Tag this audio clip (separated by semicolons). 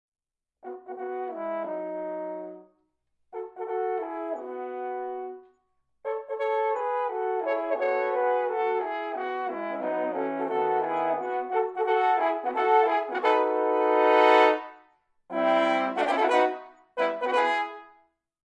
horn; quartet; don-juan; call; call-and-response; horn-quartet; heroic; response; E; french-horn; horn-call; strauss; hunting; don; fanfare; hunting-horn; juan; E-major